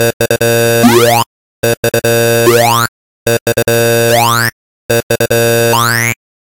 crazy noize toy2
synth, weird, loop, harsh